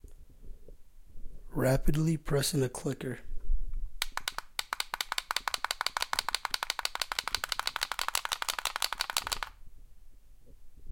Recorded with a condenser mic, rapidly pressing a condenser mic.